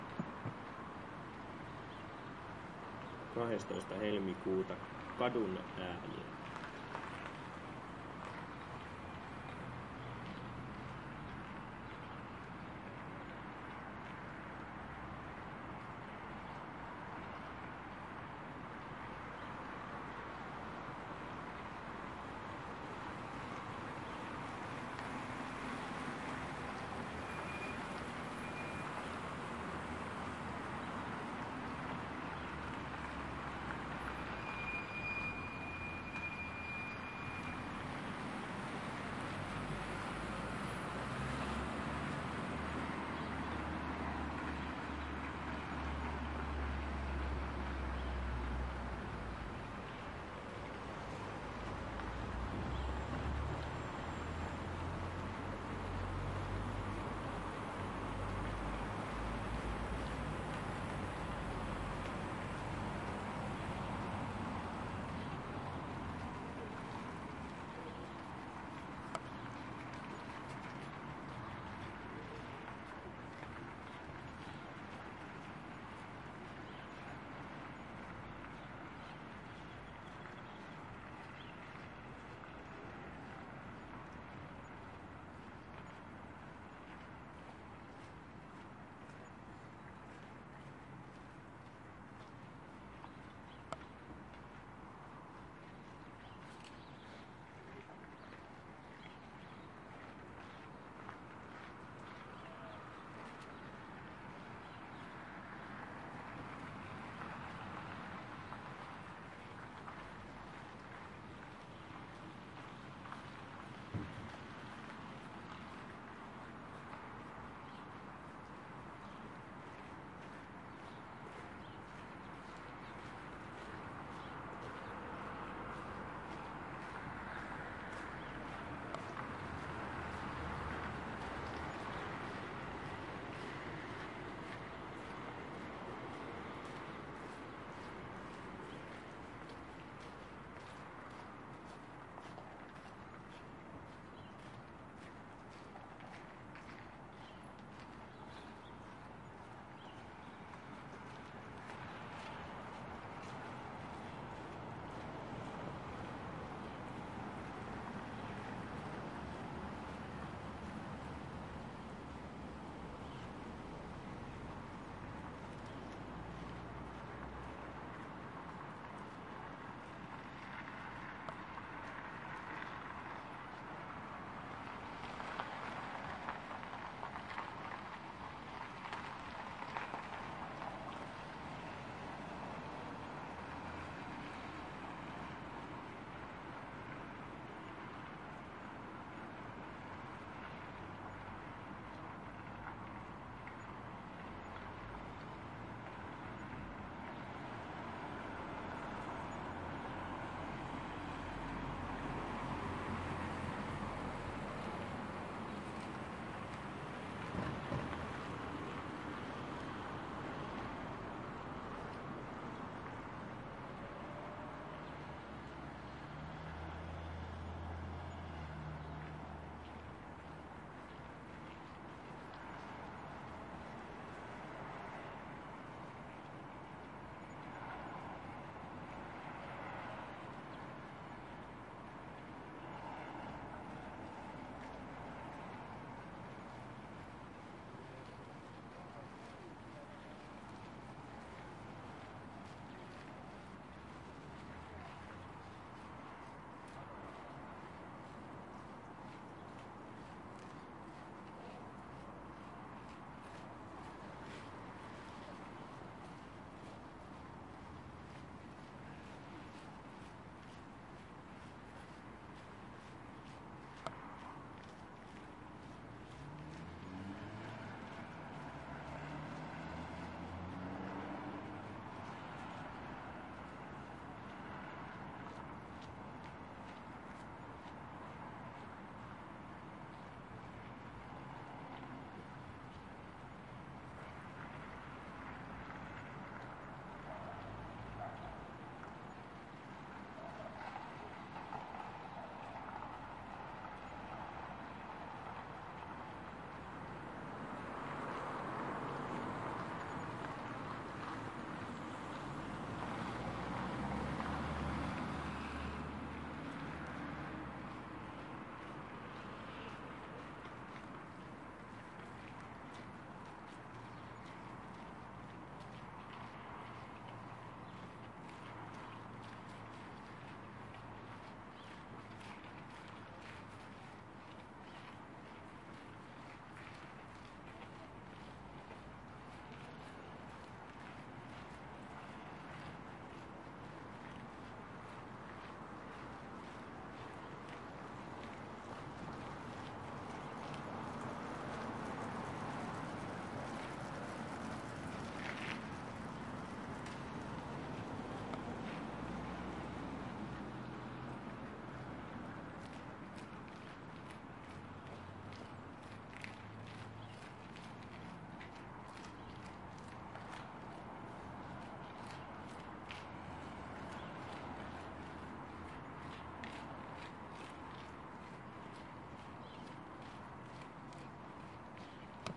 Town street February
A midday recording of my homestreet on a calm winter-day, recorded on Zoom H4n internal microphones.
Field-recording, Street, walking, January, Turku